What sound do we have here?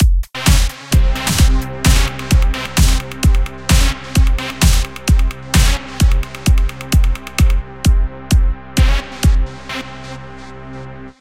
Dark Dream
drums, kick